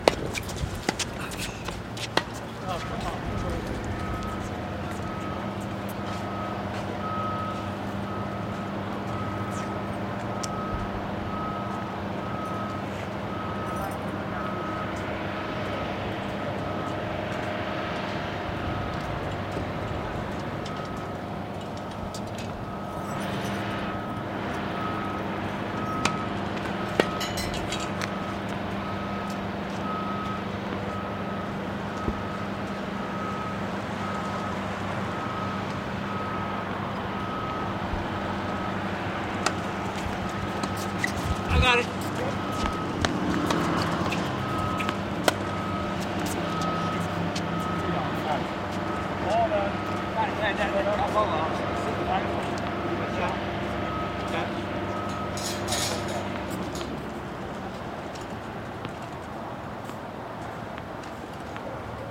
Just some research I did for a show. Old guys playing tennis.